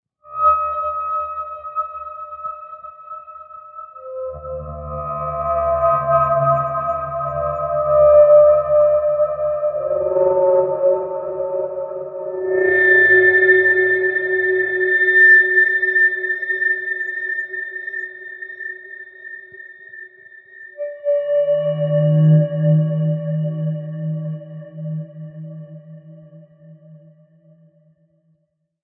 Samurai Jugular - 10
A samurai at your jugular! Weird sound effects I made that you can have, too.
dilation, effect, experimental, high-pitched, sci-fi, sfx, sound, spacey, sweetener, time, trippy